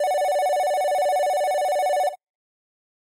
Phone ring sound effect generated by an arpeggiator.